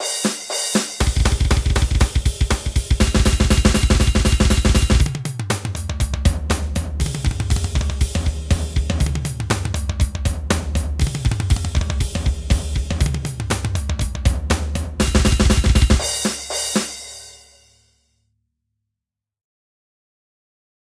No effects applied on it, except for placing the toms in the panorama.